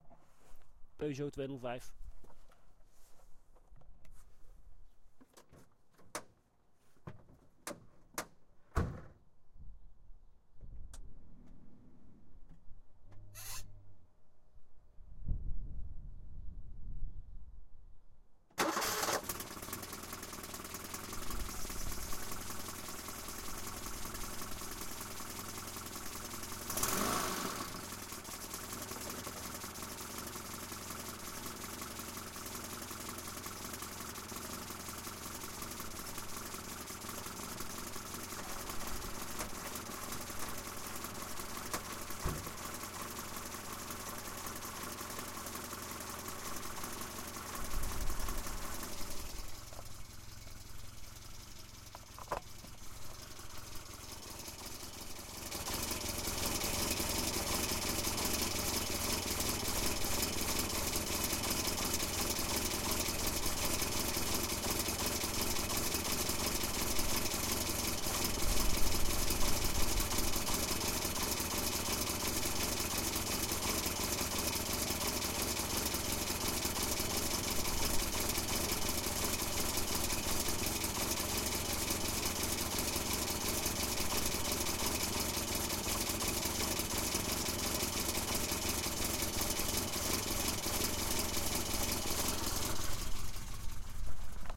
Peugeot 205 2ch Start
A two-part closemic recording of my Peugeot 205 being started and running stationary for a while.
Great beat!
Machine, Peugeot, 205, Car, Organic, Start